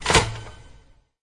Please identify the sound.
mehackit phone 1
Old retro phone mechanical noise sound for Sonic Pi Library. Part of the first Mehackit sample library contribution.
effect, electric, mechanical, mehackit, noise, old, phone, retro, sample, sound-design, sounddesign, telephone, vintage